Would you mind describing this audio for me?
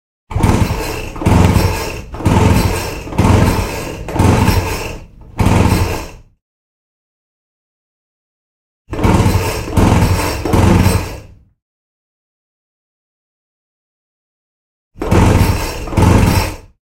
Estlack mower startup
recorded with (Studio Projects) condenser mic, mini phantom powered mixer (Behringer) and a (fostex) 4 track recorder. Location - Garage. Operator struggles to get lawnmower started. Multiple startups. Mixed with slight reverb.
engine, lawnmower, gas, combustion, internal